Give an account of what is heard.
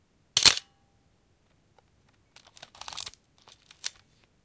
Actual sound of shutter release and film transport of a vintage Minolta XD7.
film, h2, photo, minolta, shutter, xd7, camera, transport, release, xd